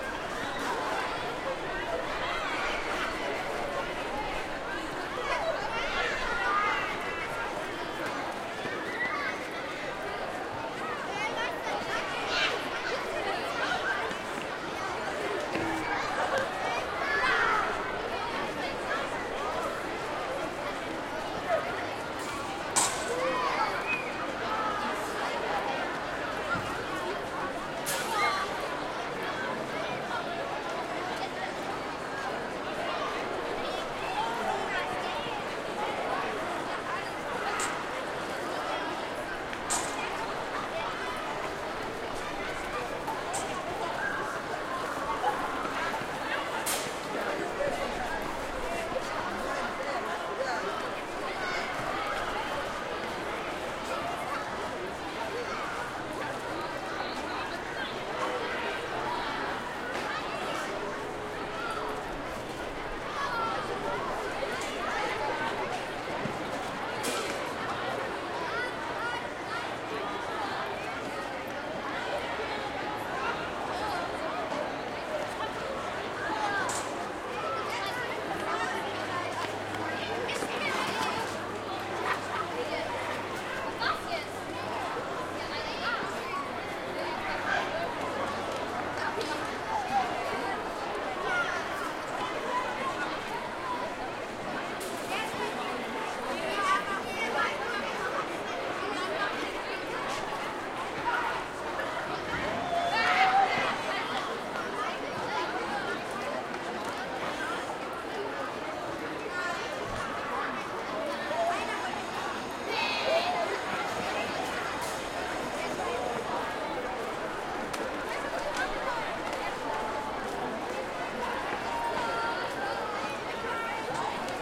Schoolyard, kids
Schoolyard, recess, ca.50 Kids, distant traffic, xy-stereo, close to Frankfurt, mic: Beyerdynamic AT 822, Recorder: M-Audio Microtrack
germany, yard